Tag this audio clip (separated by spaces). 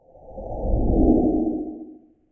whoosh woosh swoosh funky transition morph cinematic